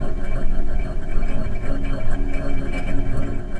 water generator 01 loop
Looping water generator ambient sound. Recorded with Audio-Technica AT2020.